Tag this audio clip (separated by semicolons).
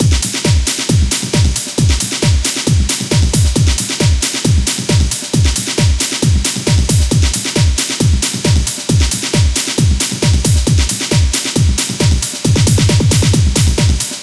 90s; Amen; Bass; Break; Breakbeat; Breaks; Dance; Drum; Drums; Early; House; Jungle; loop; n; Old; Rave; School; Techno